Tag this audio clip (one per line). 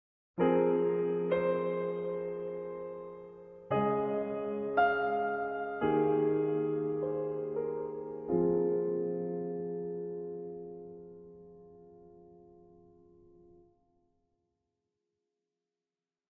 sorrow
sadness
melancholy